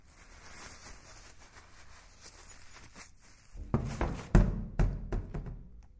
throwing garbage wing paper
throwing any garbage, in this case a paper, in a paper bin.